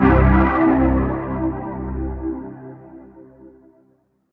warm basssynth 4102
Just something that gives one the same comfortable, warm feeling when listening to it. I have tried to obtain a synthbass sound which is warm and slightly overdriven. Listening and watching the video on the link, I wanted something that sounded like it was coming from vintage speakers and valve based synths.These samples were made using Reason's Thor synth with 2 multi-wave oscillators set to saw. Thor's filter 1 was set to 18dB Low pass, Thor's waveshaper was used to provide a touch of soft clip followed by Filter 2 also set to low pass.
analogue, vintage, synth-bass, warm, synthbass